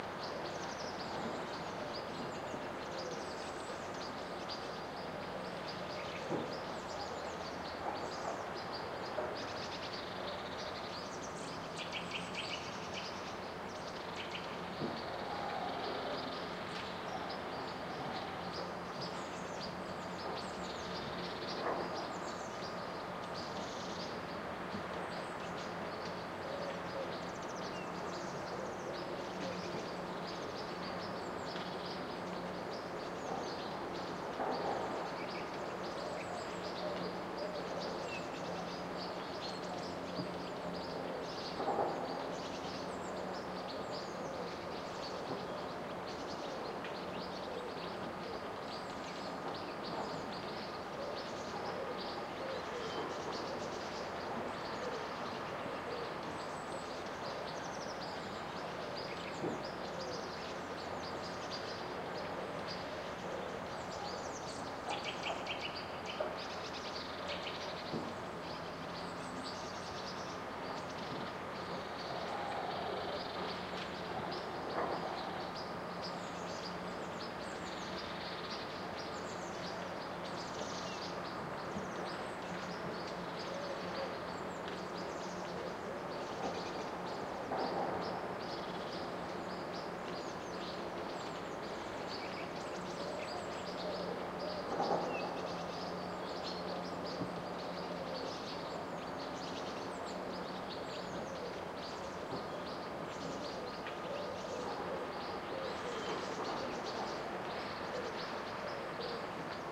SE ATMO village morning birds
ambience, atmosphere, birds, field-recording, village